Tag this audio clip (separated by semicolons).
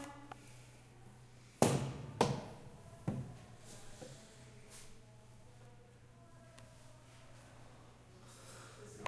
home; household; indoor; room